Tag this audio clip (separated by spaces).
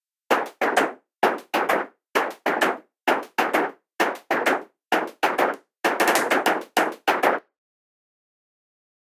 Bitcrush Bitcrushed Clap Distort Distorted Distortion Drum Drum-Bus Drum-Loop Drums Hat Hi High Loop mud Noise Rough Snare Split Stereo Thick Tom Vocode Vocoded Vocoder Washboard Wet Wide